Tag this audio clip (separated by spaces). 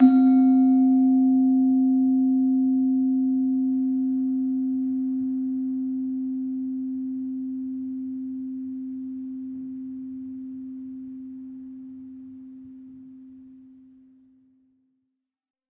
digitopia Digit Gamelan Gamel o porto Java Casa-da-m pia sica